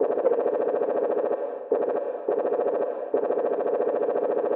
Gunshot sound obtained using granular synthesis.
lcs13, granular, gunshot, speech